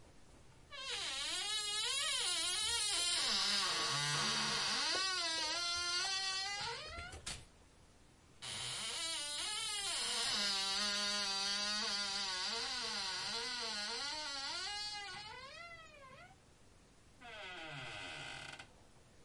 just what it says - a squeaky kitchen cabinet door, recorded with a Tascam DR-40's built-in mics.
squeak, household, creak, door